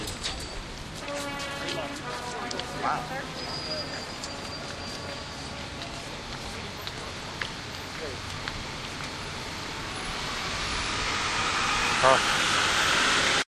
washington bustrumpet ambience
Walking towards the Capital building from the Air and Space Museum on the National Mall in Washington DC recorded with DS-40 and edited in Wavosaur.